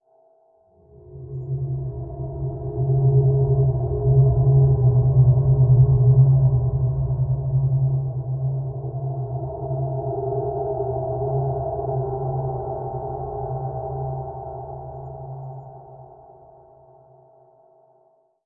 LAYERS 004 - 2 Phase Space Explorer D1
LAYERS 004 - 2 Phase Space Explorer is an extensive multisample package containing 73 samples covering C0 till C6. The key name is included in the sample name. The sound of 2 Phase Space Explorer is all in the name: an intergalactic space soundscape. It was created using Kontakt 3 within Cubase and a lot of convolution.
multisample, drone, pad, artificial, soundscape, space